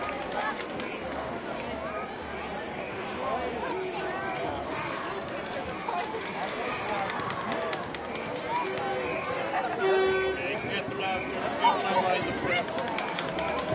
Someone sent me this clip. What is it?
Crowd noise at parade
Generic crowd chatter at a St. Patrick's Day parade
ambient, field-recording, people, parade, crowd, chat